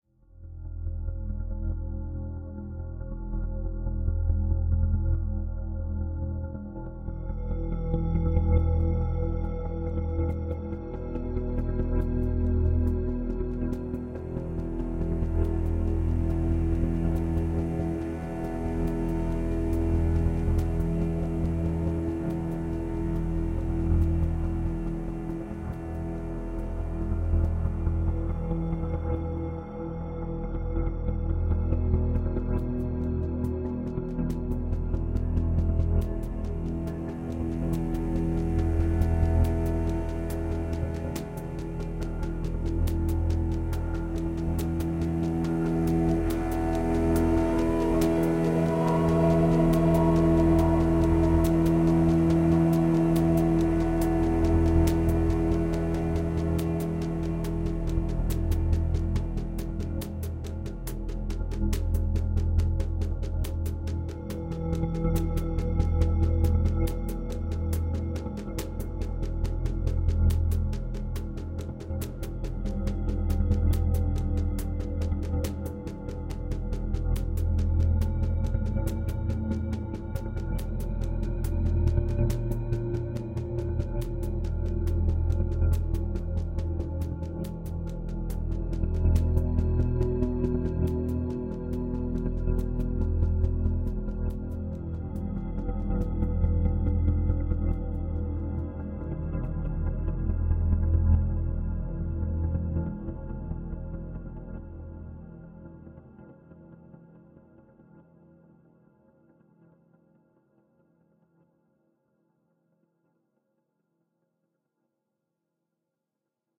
Ambient Drone 21.07.2018
Level sound, drone, ambient. Used: Strings, Drums, Synth.
ambiance, ambient, anxious, background, background-sound, creepy, disgust, disgusting, drone, dying, evolving, experimental, fear, Gothic, haunted, horror, level, light, loading, location, nature, pad, scary, sinister, soundscape, stalker